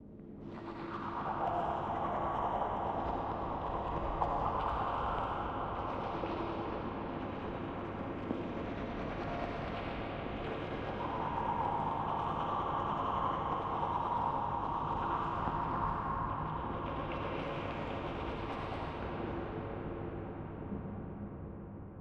teeth brushing
film, OWI, teeth-brushing